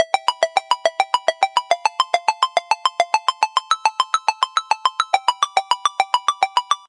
16 ARP 8VA
16, 3, 8va, alert, arp, cell, cell-phone, free, jordan, mills, mojo-mills, mojomills, mono, phone, ring, ring-alert, ring-tone, tone